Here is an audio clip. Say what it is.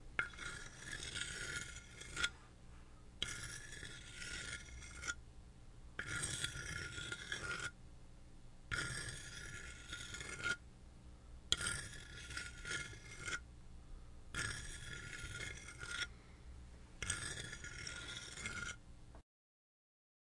Razguñando madera 1 s
Madera es razguñada con un tenedor.
dmi, razguando, madera, cali, interactivos, Audio-Technica, estudio, medios